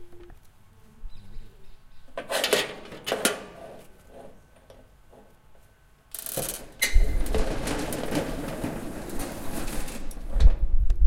Unlocking Large Metal Door
Large metal door being opened in theatre.
chains, clang, door, effects, hit, large, metal, metallic, ominous, opening, sfx, steel, ting